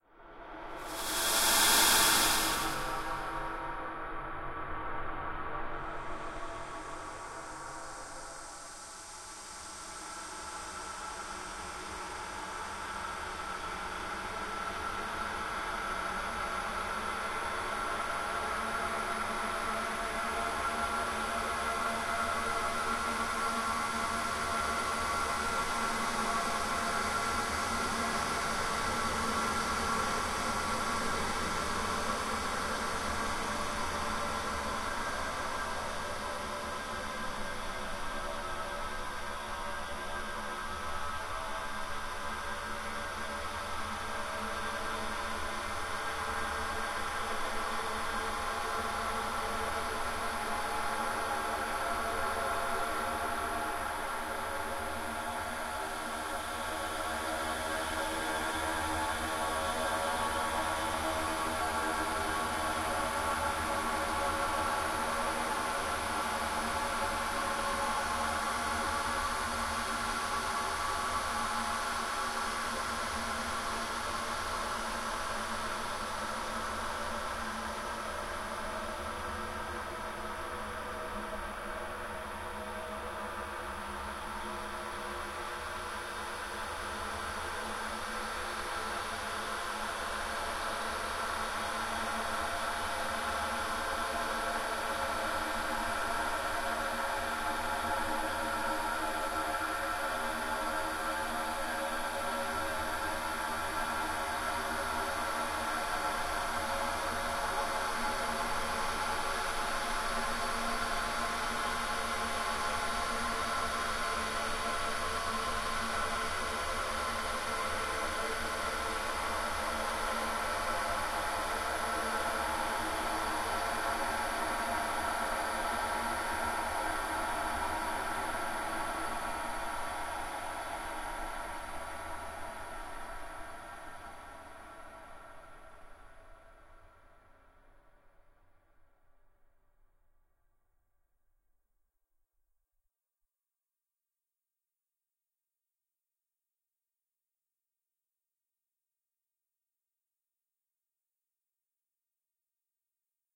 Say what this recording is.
Suspense/Horror Ambience
Made using audacity and pual stretch